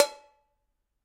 Hit to the can
Hit to the coffee can. Percussive sound.
percussion, tin, percussive-sound, hit, spoon, blow, can